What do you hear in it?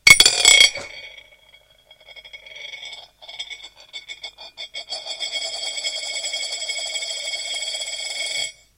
rotation1usd
Coins from some countries spin on a plate. Interesting to see the differences.
This one was a US 1 dollar